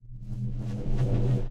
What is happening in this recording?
low balloon delayed
balloon, delay, low